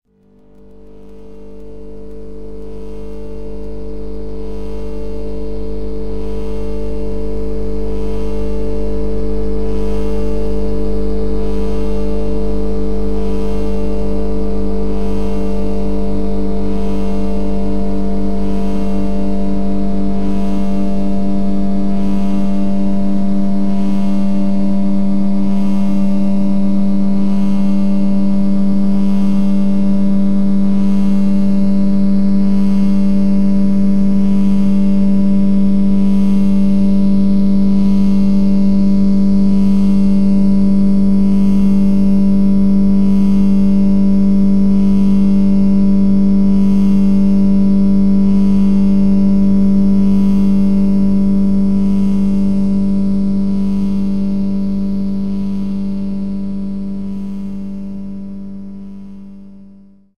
precession demo 3
60 seconds drone made with "precession", a drone generator I'm building with reaktor.